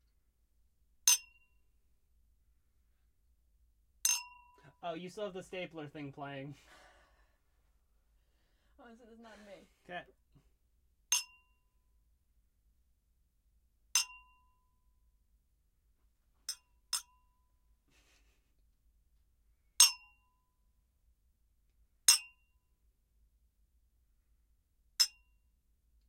Glass Clinks
Two wine glasses full of water clink
dishes, clink, silverware, clank